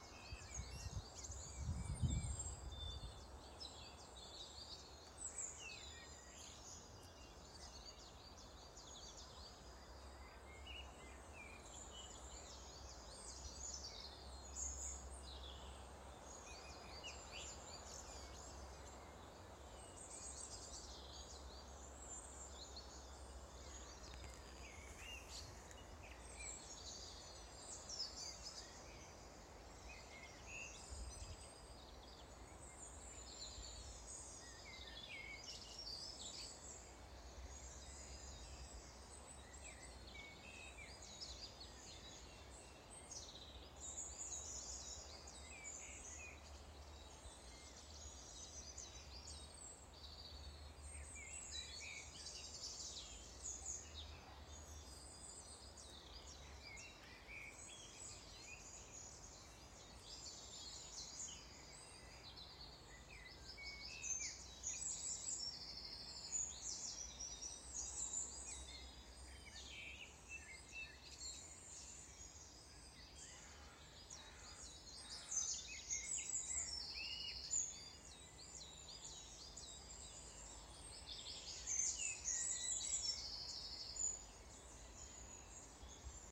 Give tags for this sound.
Wind Birdsong Atmosphere

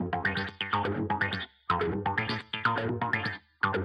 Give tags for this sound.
125-bpm tech-house guitar-loop

